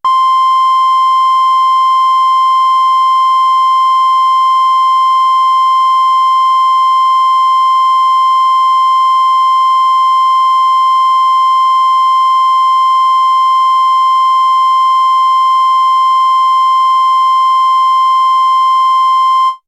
Mopho Dave Smith Instruments Basic Wave Sample - TRIANGLE C5
mopho, instruments